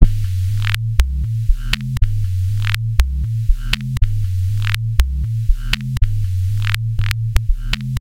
bassline with clicks e c120bpm-06
bassline with clicks e c120bpm
acid, ambient, bass, bassline, bounce, club, dance, dub-step, electro, electronic, glitch-hop, house, loop, rave, seq, sequence, synth, synthline, techno, trance